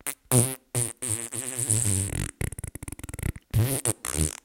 Farts Fake 001.
fake, fart, rectum, bowel, funn, rectal, funny, farts, smell, brew, amek, macabre, breaking, trump, gass, weird